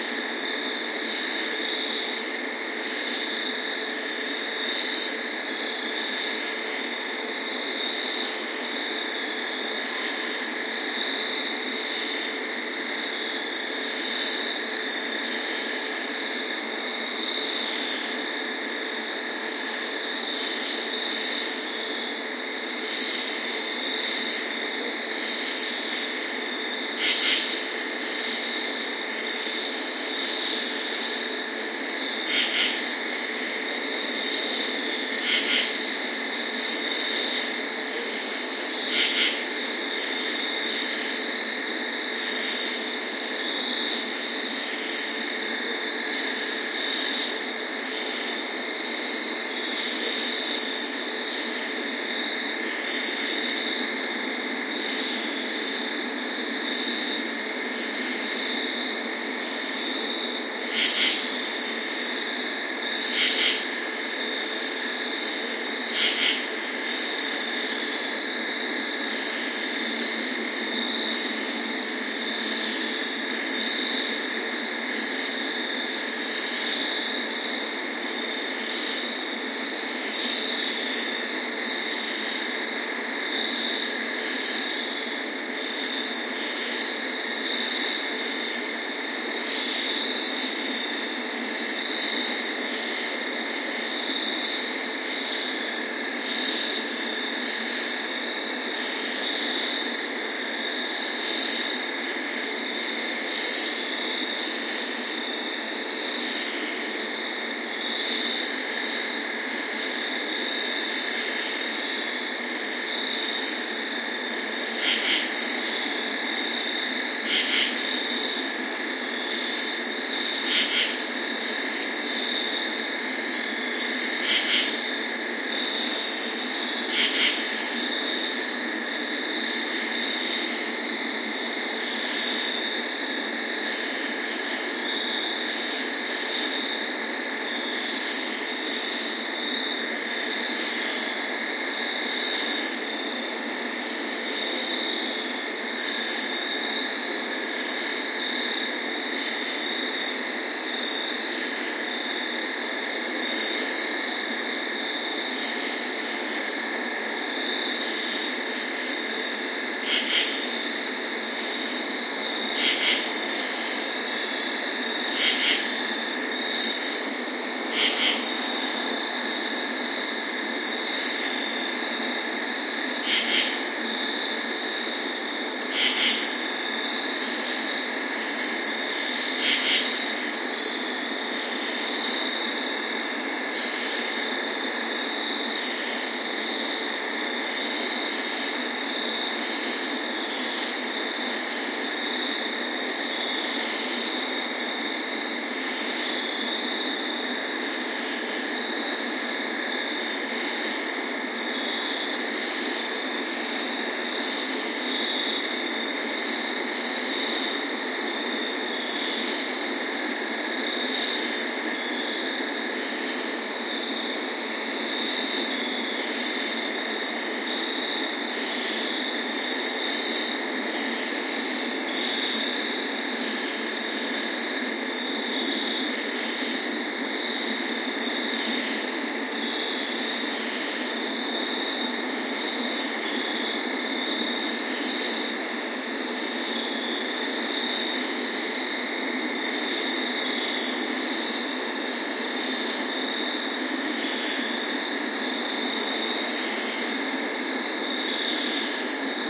Nighttime ambiance recorded at 2 a.m. in my backyard.